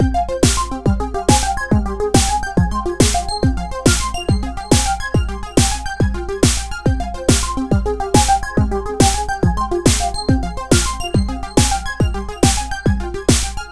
trance pulse 2 140bpm
using my last test to make a little song, should be able to loop
metal, music, techno